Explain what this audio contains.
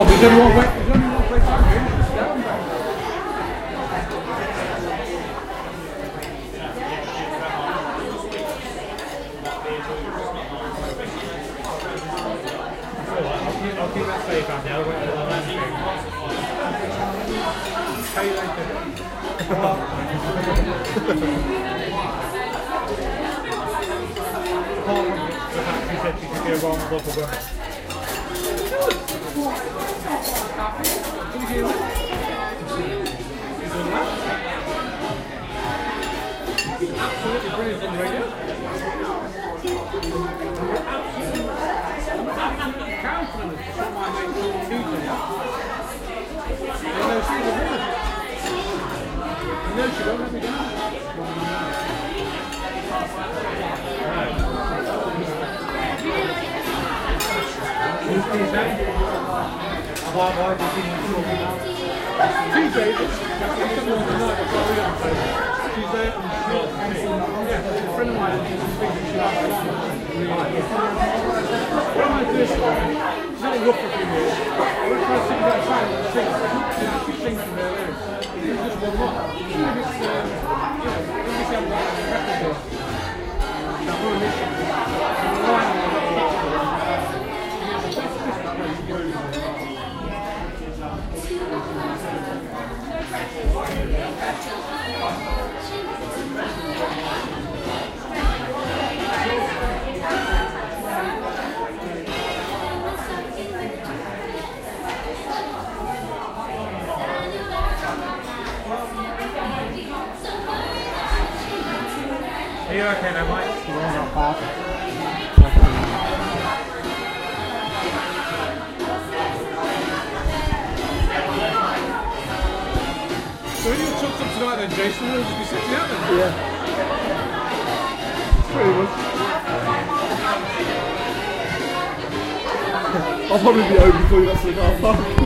ambience - restaurant
The ambience in a busy restaurant.
People, Restaurant